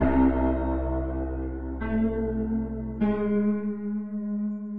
100 Glassy Piano 02
glassy dark piano melody
dark, free, glass, ominus, piano